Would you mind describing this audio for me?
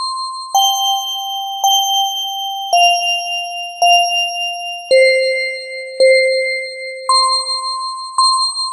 Part of the Beta loopset, a set of complementary synth loops. It is:
* In the key of G mixolydian, following the chord progression G7sus4 Fsus2.
110bpm, synth